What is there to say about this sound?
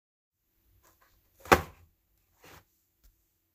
opening an egg carton